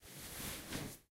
the sound of sitting somewhere soft